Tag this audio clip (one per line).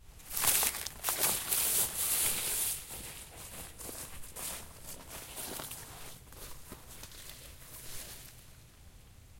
nature
field-recording
steps